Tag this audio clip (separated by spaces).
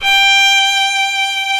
arco keman violin